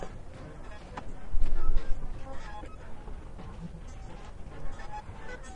masts collide wind rythmically
Modification of pitch and speed of the sound of several masts on boats that collide with the wind in order to reinforce their rhythm, it happens in a boat parking in the Port Antico of Genova.